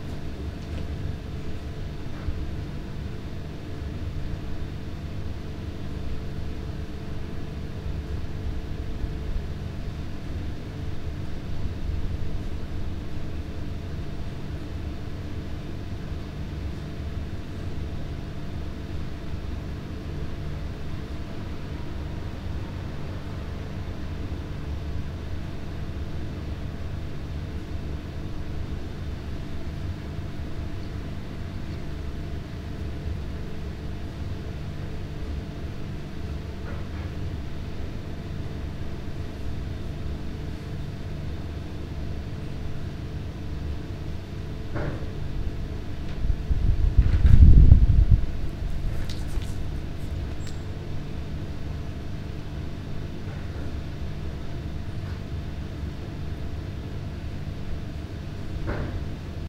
silent street ambience handling noises
ambience, street